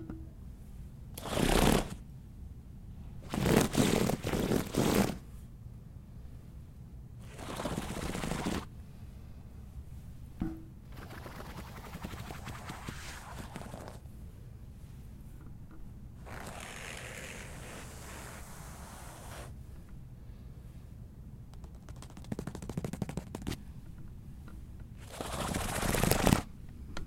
Rubbing hand on a cloth divider